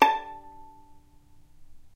violin pizz vib A4
violin pizzicato vibrato